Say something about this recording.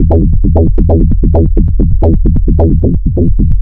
its a loop.
bass.
made with reaktor ensemble ttool01.
greetings from berlin city!
bass, bassloop, electro, loop, synthesizer, synthetic, tekno, trance